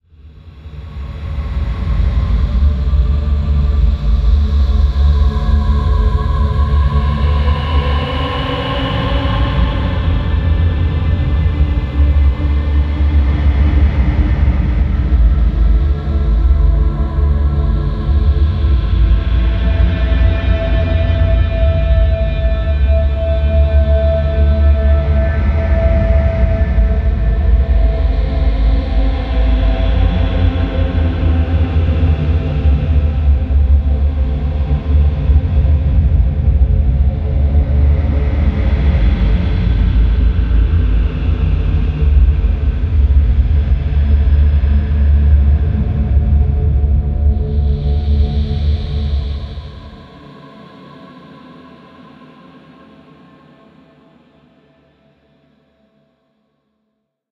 new ambience piece. i like this small section of audio at around 30 seconds in, it's a guitar-like bass sound. hope you dig it. some of those sounds sound like voices but i only used 1 voice sample in this.. the rest are manipulated instruments that sound like voices.